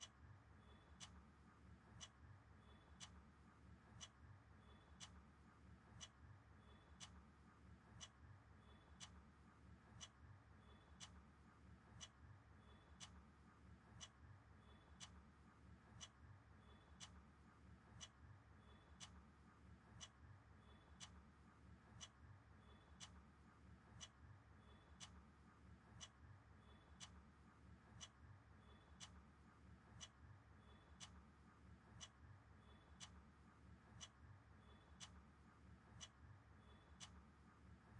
Hi Friends!
This is a sound of a wall clock ticking in my house which was recorded on zoom recorder.
Hope you can use it.
Thank you
Rohan.
Ambience, Clock, wall-clock, Ticking, Indoors, Room